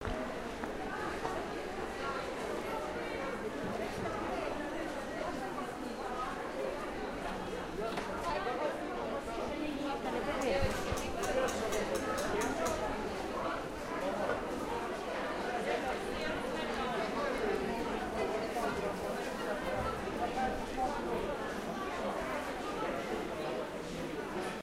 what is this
Traditional Farmers market in middle fall season in small town in meat section